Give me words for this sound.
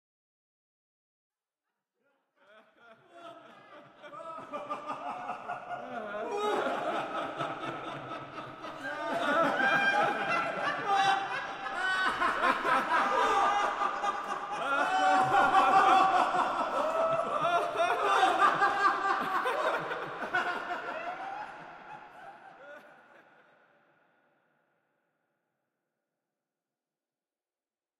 a group is laughing; reverbed fade-in and fade-out